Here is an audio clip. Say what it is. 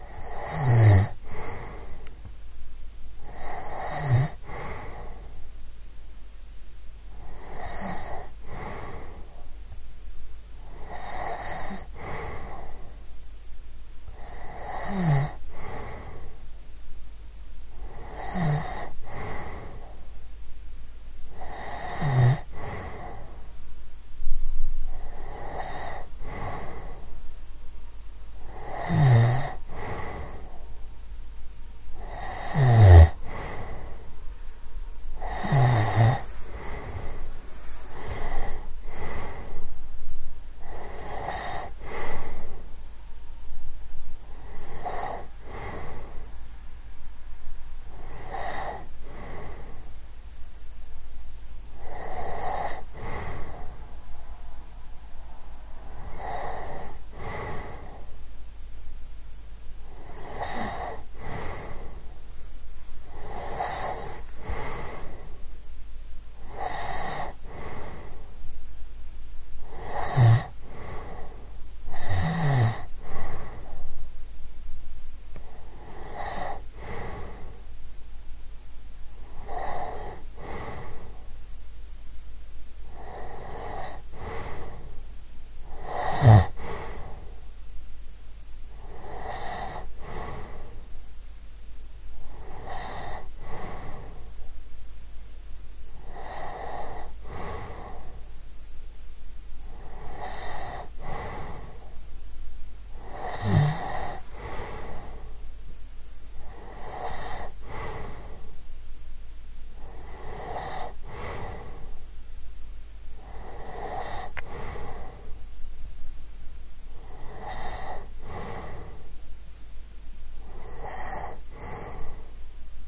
Schnarchen - Frau
real snoring of a woman
woman, snore